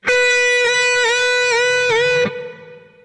12th fret notes from each string with tremolo through zoom processor direct to record producer.